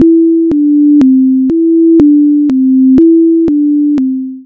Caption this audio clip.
Synthetic Mi-re-do sound generated from an inverted do-re-mi sinusoid sequence, repeated twice. It was modulated using the envelope tool, modified each half second, finally a fade out effect was added.